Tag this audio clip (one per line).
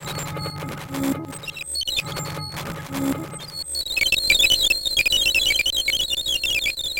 beats
tabla
glitch
noise
circuit
bent
tribal